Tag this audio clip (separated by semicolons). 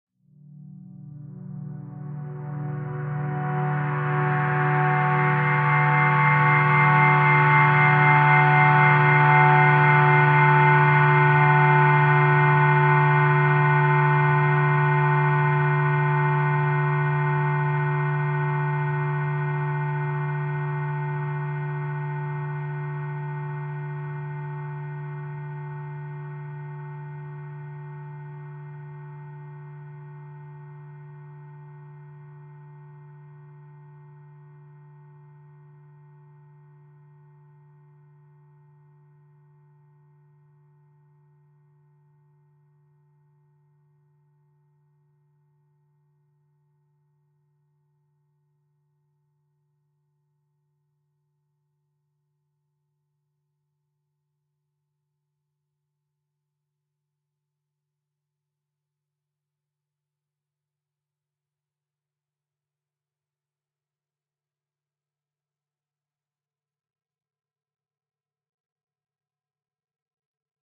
swell; synth; pad